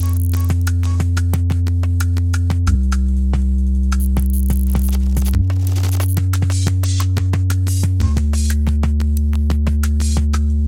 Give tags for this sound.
drastic dee-m processed soundscape harsh ey m glitch background noise pressy virtual d ambient dark idm

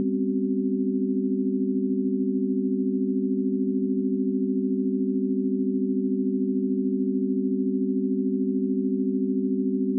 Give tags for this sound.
chord signal test pythagorean

